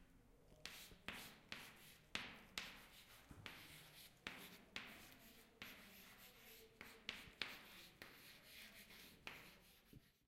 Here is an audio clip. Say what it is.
20141125 chalk H2nextXY
Sound Description: 'Hallo' an Tafel schreiben mit Kreide
Recording Device: Zoom H2next with xy-capsule
Location: Universität zu Köln, Humanwissenschaftliche Fakultät, HF 216 (Block C, UG, Psychologie)
Lat: 6.919444
Lon: 50.934444
Date Recorded: 2014-11-25
Recorded by: Camilla Morr and edited by: Hannah Espelage
Cologne, Field-Recording, University, activity